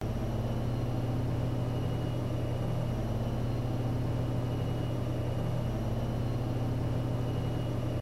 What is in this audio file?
washing machine A (monaural) - Spin 4
Original 3s field recording pitch-shifted to remove pitch variation due to change in spin speed. Then three concatenated with fade-in/fade-out to create longer file. Acoustics Research Centre University of Salford
processed, recording, washing-machine